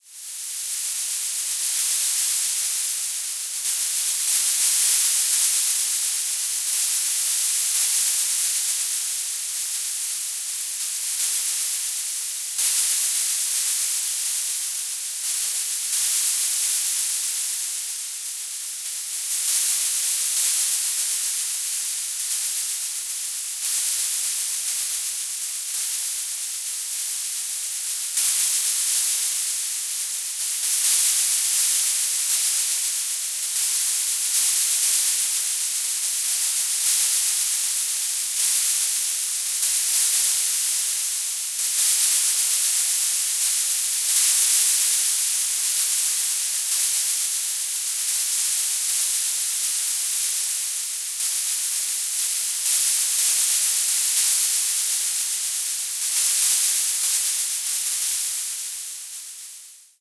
This sample is part of the "Space Drone 3" sample pack. 1minute of pure ambient space drone. Shaker like noises.
ambient, drone, reaktor, soundscape, space